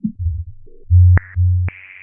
bc8philter7
various bleeps, bloops, and crackles created with the chimera bc8 mini synth filtered through an alesis philtre
alesis-philtre, bleep, crackle